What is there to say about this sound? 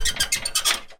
Painful metal squeaks shaking a rickety shelf.
grind, high-pitched, metal, rickety, rustle, shake, squeak, squeeks